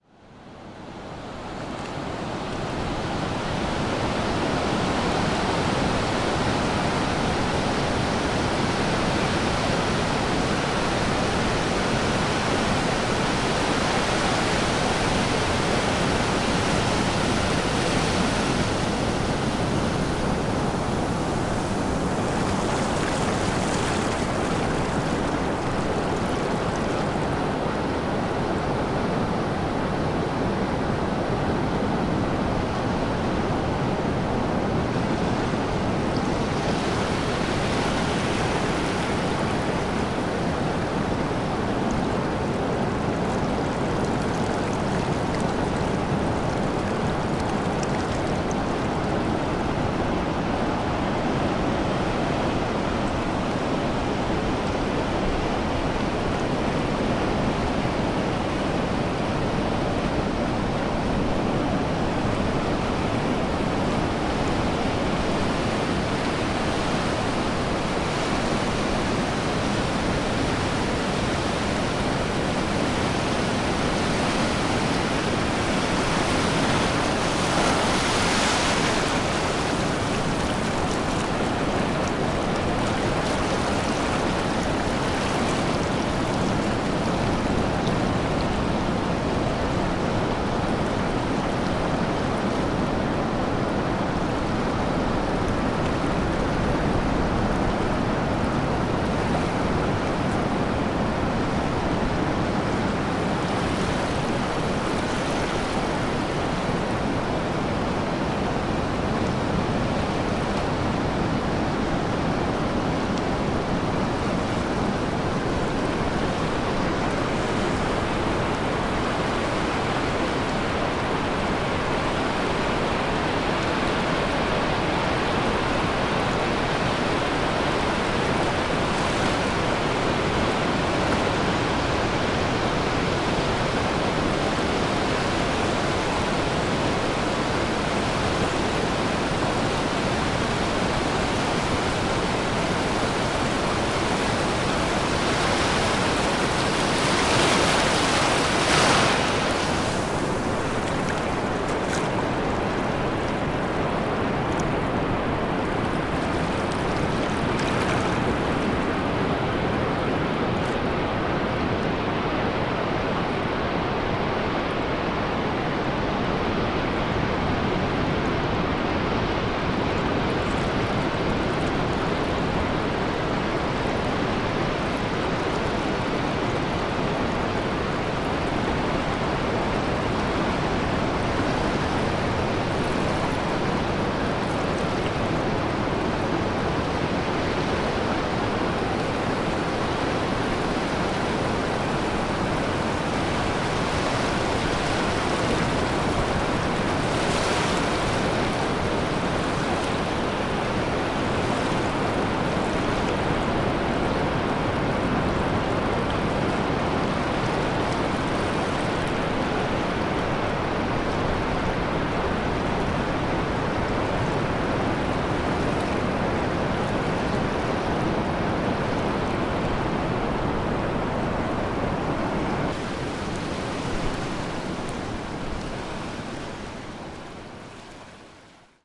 more heavy surf
The white noisy roar of waves crashing on a beach, recorded at a distance. Recorded w/ D-50 internals, in XY.
roar, field-recording, ocean, surf, beach, waves